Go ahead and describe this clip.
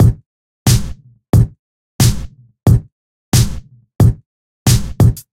fresh bangin drums-good for lofi hiphop